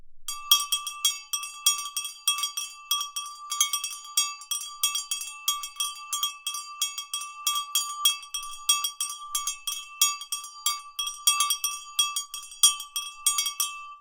A small metal cow bell ringing repeatedly. The sound was recorded with a Shure SM81 microphone and an Edirol R44 recorder.
bell, cow, metal, ring, small